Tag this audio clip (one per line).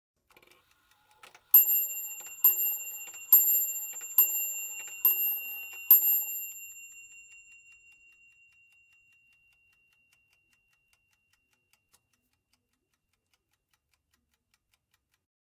carriage
chimes